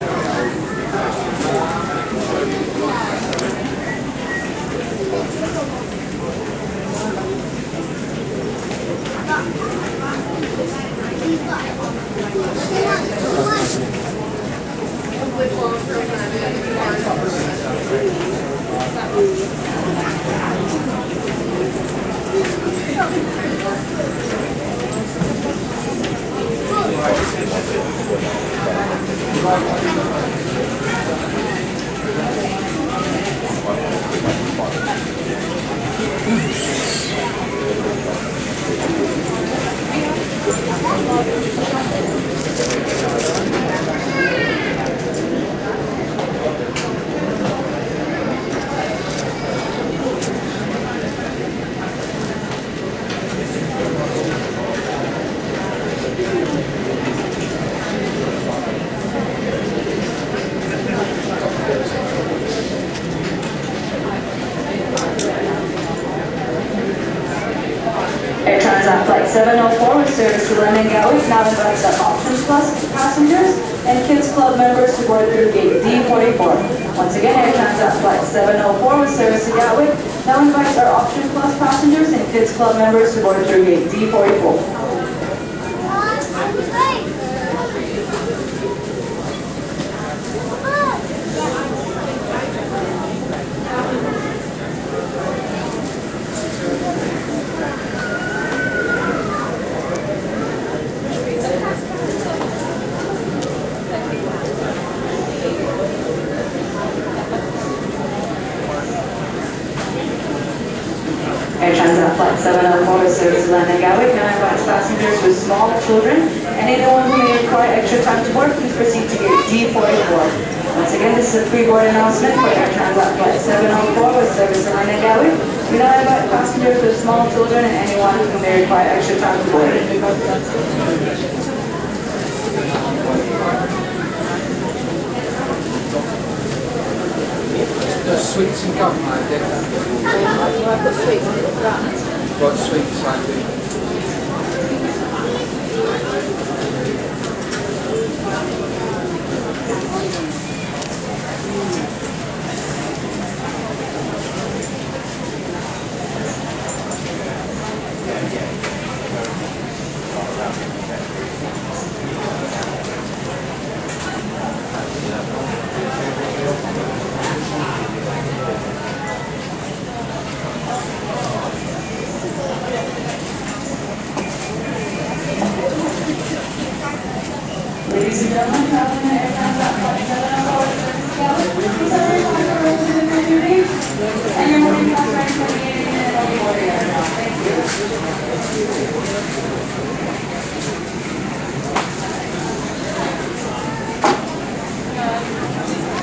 Ambience recorded when waiting at a gate. At this time most seats were filled around me so the chatting is louder than on the other sound effect I uploaded.
You hear airco, people chatting, kids, movement and cash register sounds. Also three announcements are heard about boarding halfway.